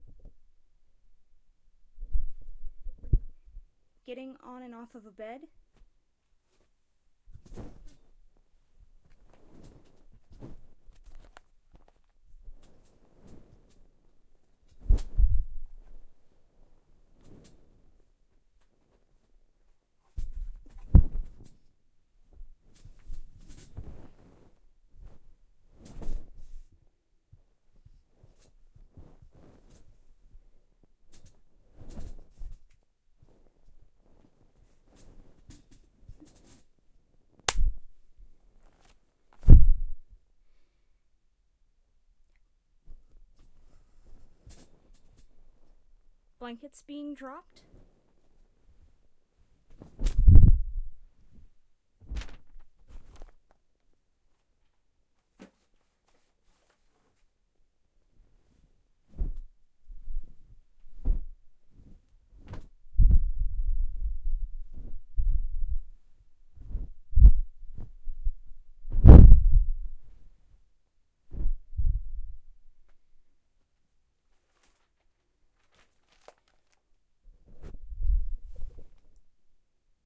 Blankets on off bed
windy thump of blankets hitting a bed